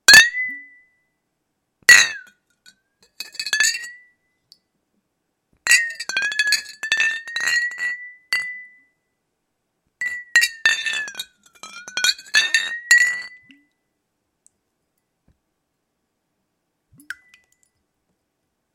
This strange sound was produced by sliding a empty bottle upside down through a glass of water, changing its resonance and the frequency of the sound.
Recorded with Focusrite Solo, Sennheiser 64